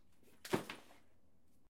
Dropping Bag on Floor
A bag being dropped onto a tile floor.